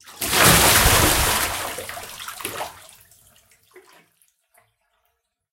Water splash, emptying a bucket 7
I was emptying a bucket in a bathroom. Take 7.
splash, bath